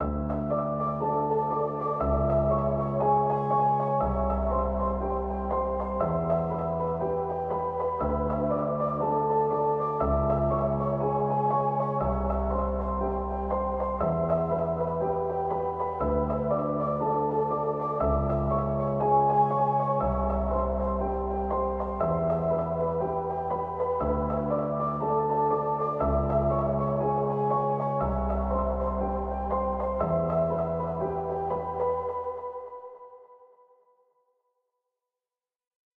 Dark loops 217 piano without melody and efect short loop 60 bpm
This sound can be combined with other sounds in the pack. Otherwise, it is well usable up to 60 bpm.
60, 60bpm, bass, bpm, dark, loop, loops, piano